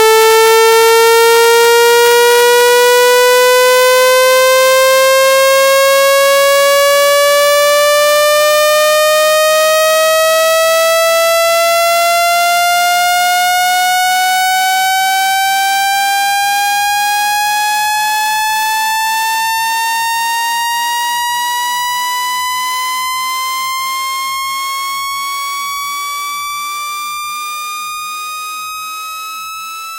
audacity, chirps and delay